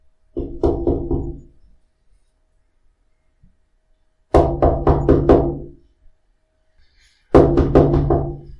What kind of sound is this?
Me knocking on window.
knock, window, knocking-window, knocking